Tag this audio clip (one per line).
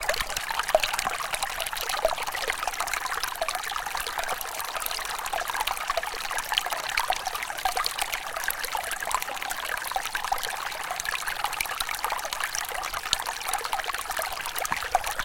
field-recording
nature
river
runnel
stream
water